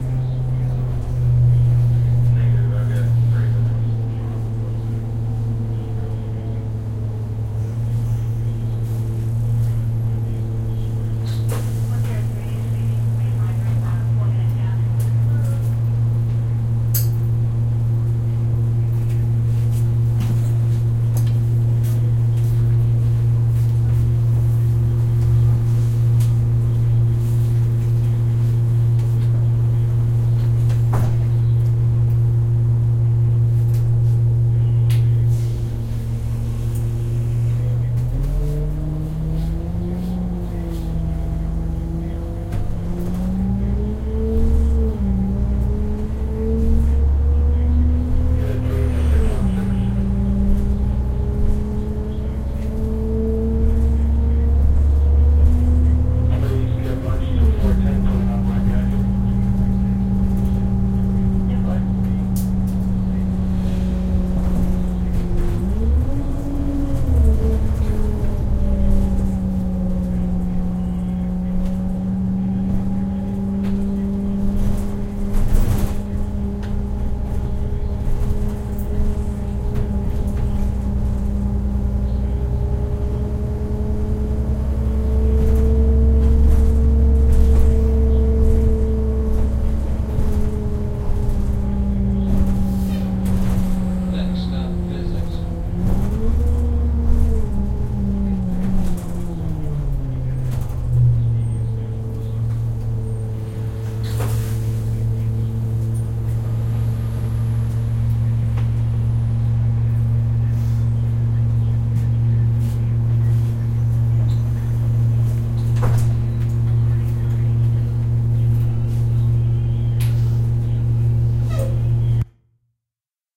The interior sound of a bus loading up with passengers, driving, and then passengers disembarking.